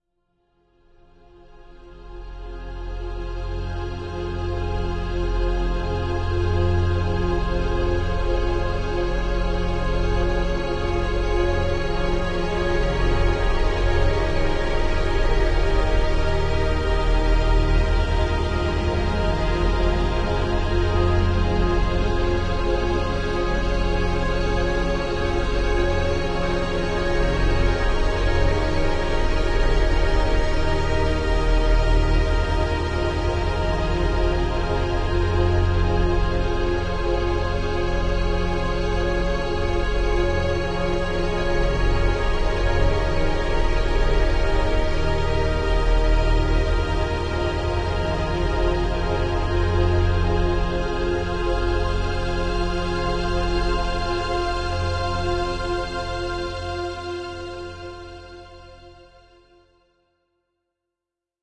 An ethereal sound made by processing a acoustic & synthetic sounds.
emotion, atmospheric, floating, blurred, synthetic-atmospheres, ethereal